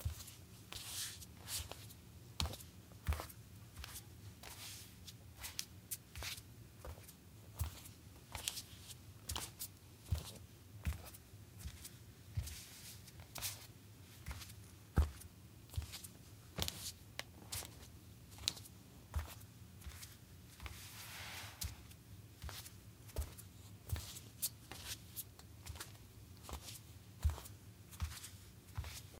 Footsteps, Solid Wood, Female Barefoot, Flat-Footed, Slow Pace
barefoot, female, footsteps, solid, wood